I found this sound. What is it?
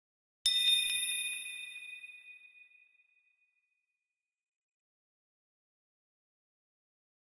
Sound of an idea coming to you